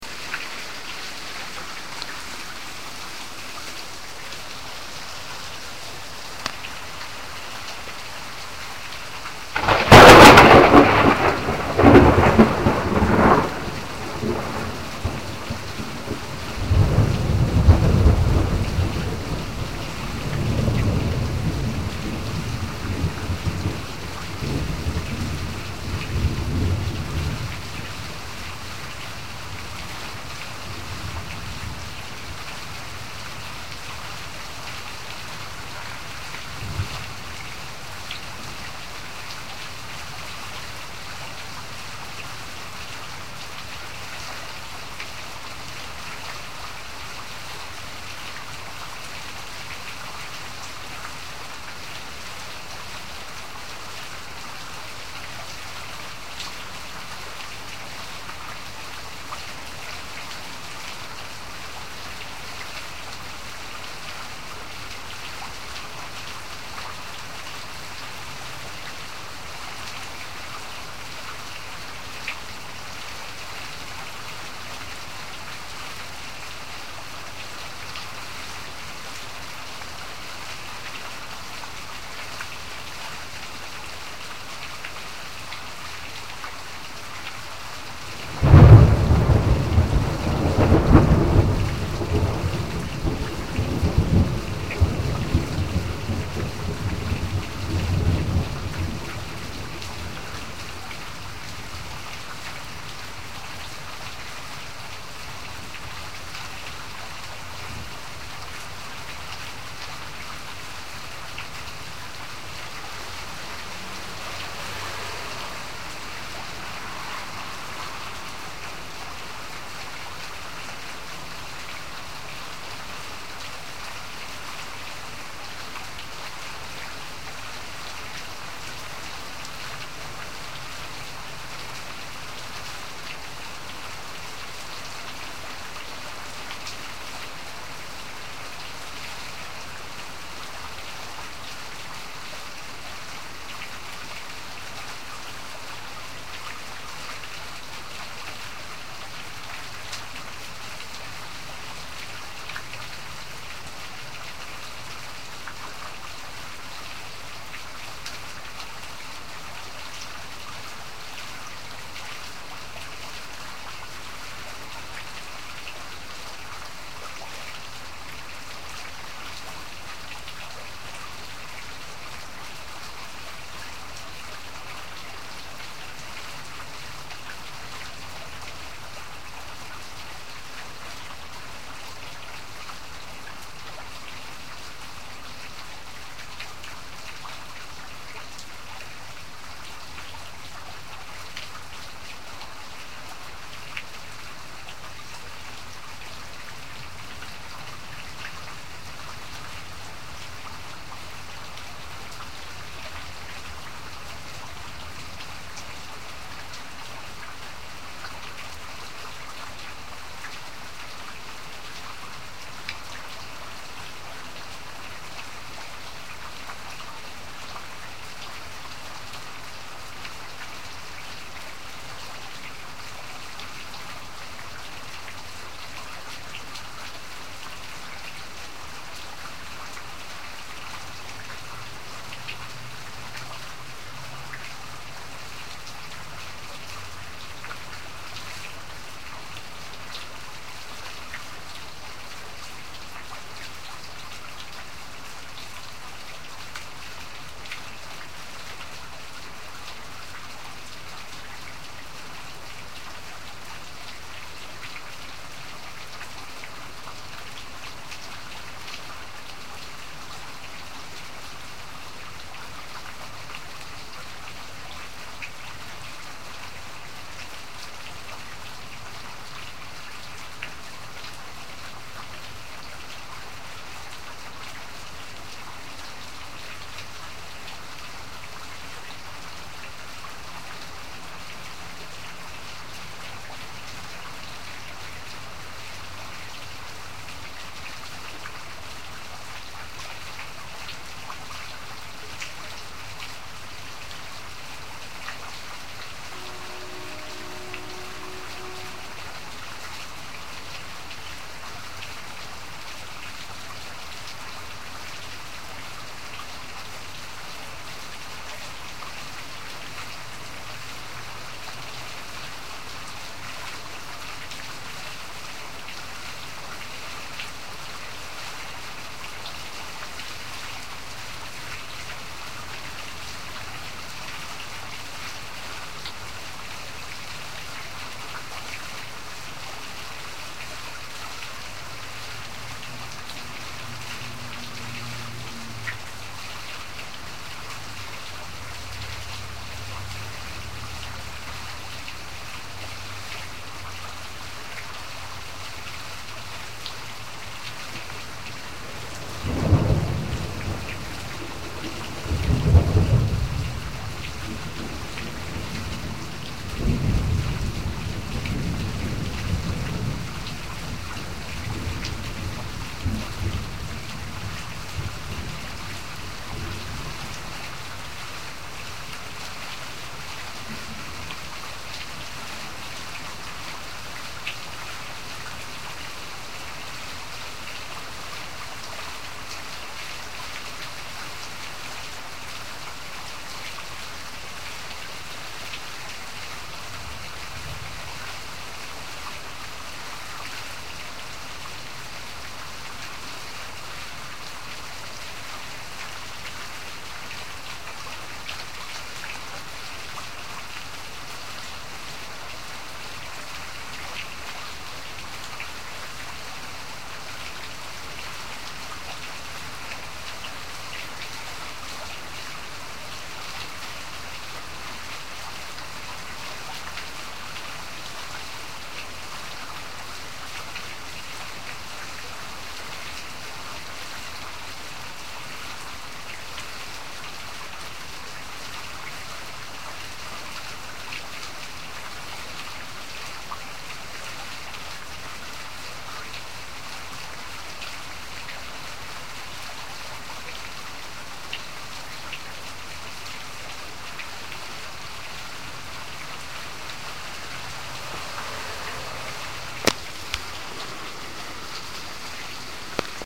Rain with Thunder
Rainy afternoon on the front porch in Tampa, Florida. Recorded with Iaudio 5